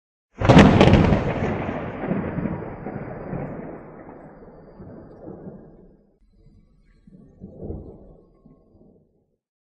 the short sound of a thunder
thunder, recording, sample, sound, environmental-sounds-research, field-recording